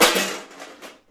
aluminum cans rattled in a metal pot
cans, aluminum